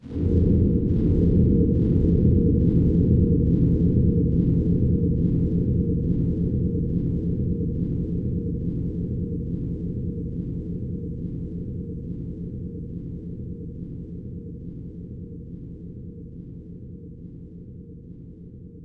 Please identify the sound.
a delayed drone sound